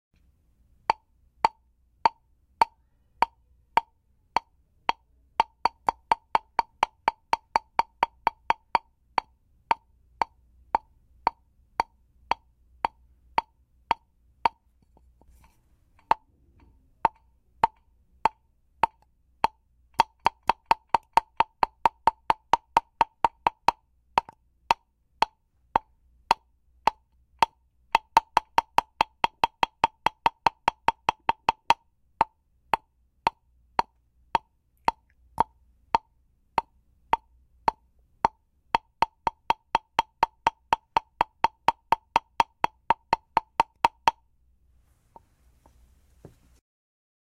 Gravado no estúdio com um microfone condensador, de um socador de alho.
Gravado para a disciplina de Captação e Edição de Áudio do curso Rádio, TV e Internet, Universidade Anhembi Morumbi. São Paulo-SP. Brasil.
Recorded in the studio with a condenser microphone, from a garlic punch.
Recorded for the discipline of Capture and Audio Edition of the course Radio, TV and Internet, Universidade Anhembi Morumbi. Sao Paulo-SP. Brazil
alho, apressado, casco, cavalo, coco, coconut, crrida, crushed, de, drum, garlic, hammer, hammered, handcuff, horse, hulk, hurried, hurry, madeira, martelada, martelo, passo, pressa, sapato, shoe, socador, step, tambor, wood